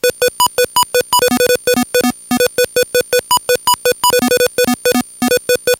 Orion Pattern 1
big, c64, chiptunes, drums, glitch, kitchen, little, lsdj, me, melody, my, nanoloop, sounds, table, today